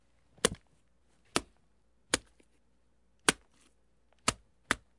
Many successive chopping sounds, great for scenes of violence or chopping produce!